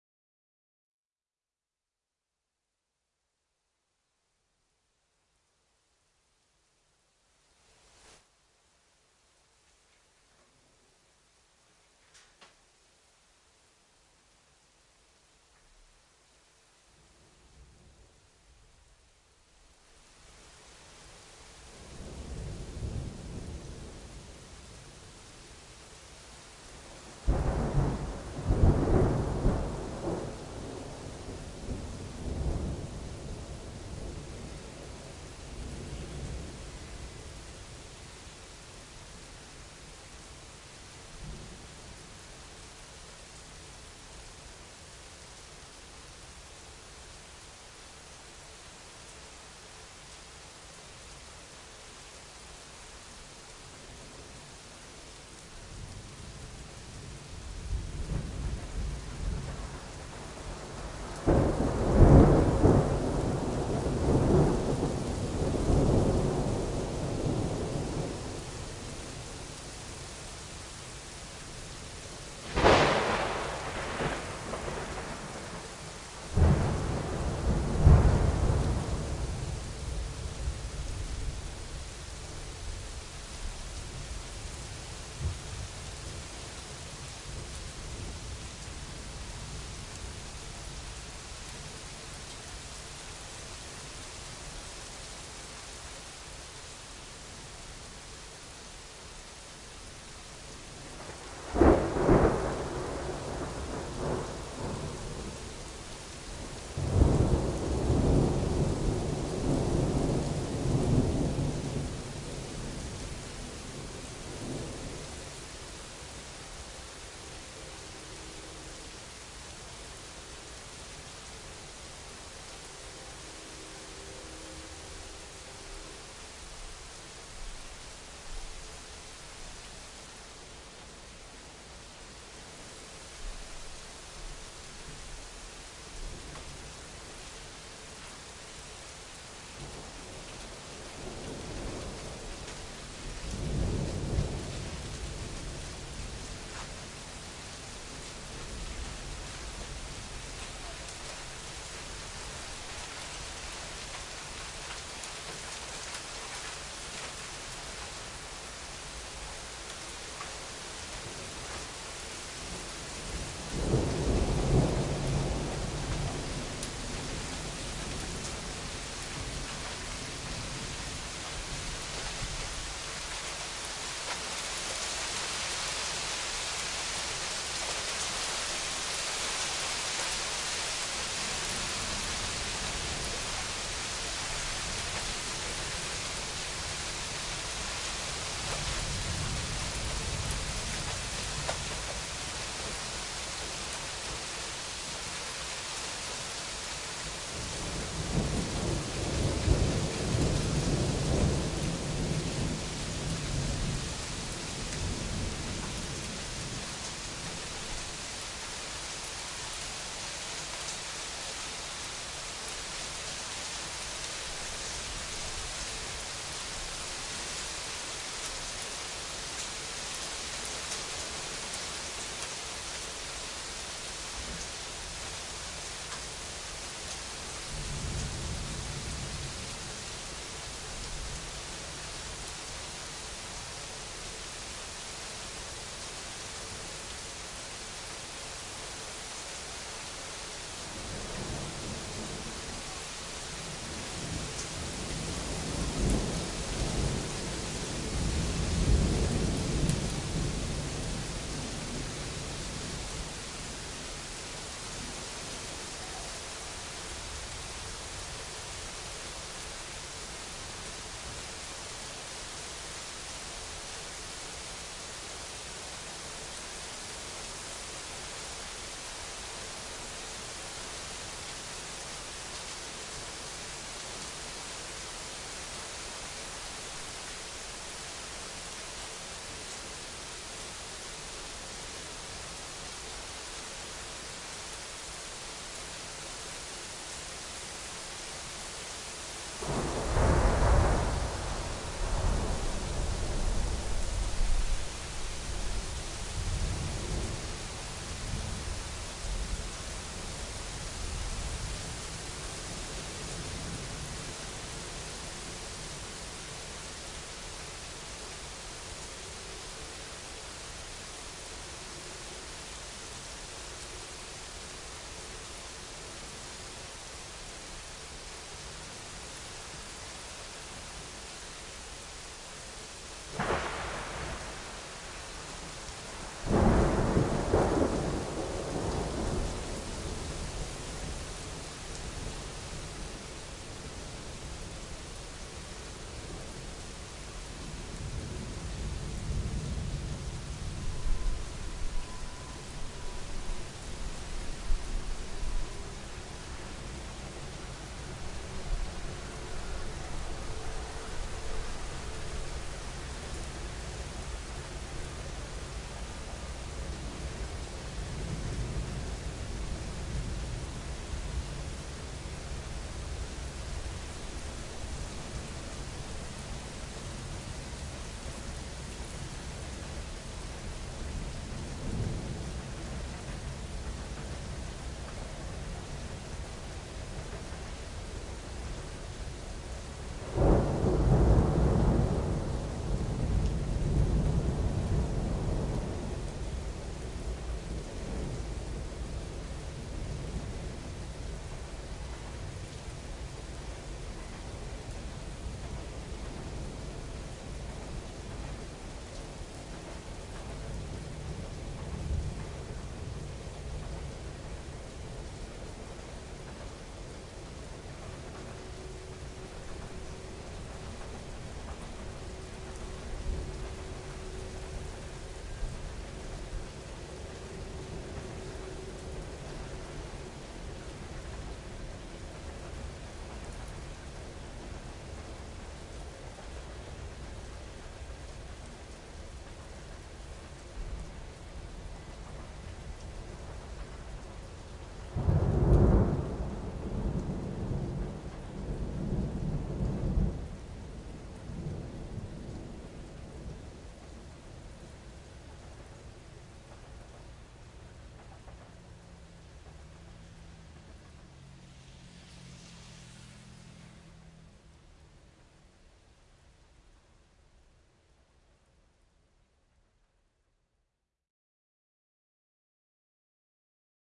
A thunderstorm, recorded from my porch in Marietta, GA using a Rode NT1 microphone.